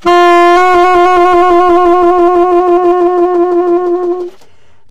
TS semitone trill f3
tenor-sax, sax, vst, woodwind, saxophone, jazz, sampled-instruments